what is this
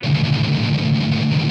dis muted D guitar
Recording of muted strumming on power chord D. On a les paul set to bridge pickup in drop D tuneing. With intended distortion. Recorded with Edirol DA2496 with Hi-z input.